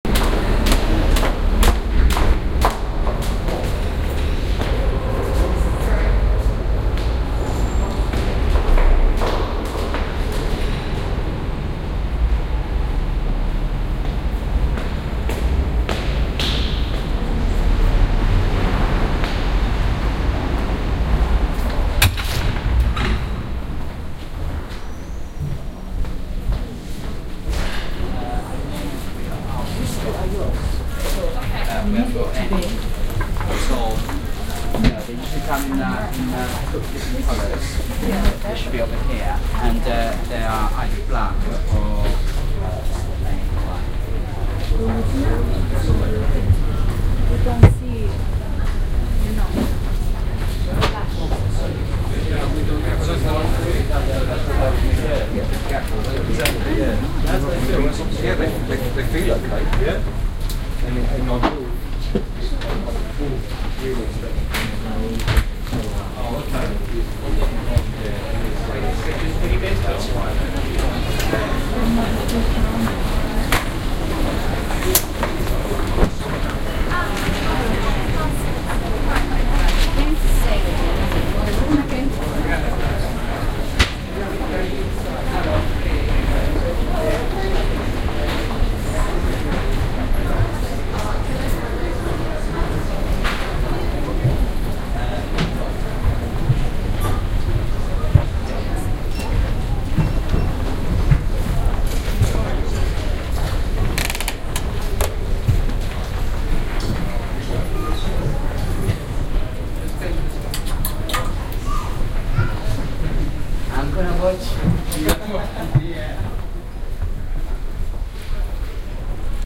Sloane Square - Peter Jones Dept store